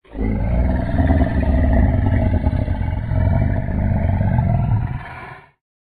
Colossal growl
Inspired by the Monster Hunter videogame franchise. Made these sounds in Ableton Live 9. I want to get into sound design for film and games so any feedback would be appreciated.
growl, giant-monster, monster, giant, beast, scary